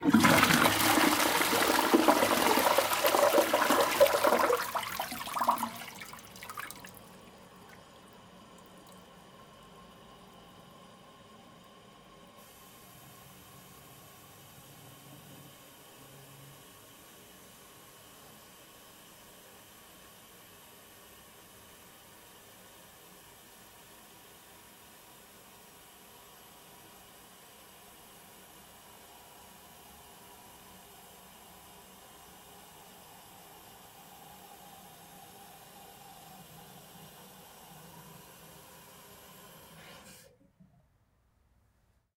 Tested out my new Zoom F8 with a Slate Digital ML-2 Cardiod Smallcondenser-Mic. I decided to record different sounds in my Bathroom. The Room is really small and not good sounding but in the end i really like the results. Cheers Julius